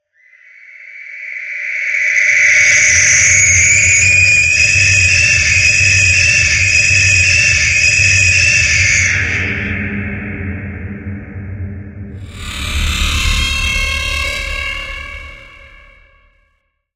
Jump-Scare 3
Remix of sound effects to provide a jump scare for a Halloween prop
loud, animatronic, jump-scare, prop, scream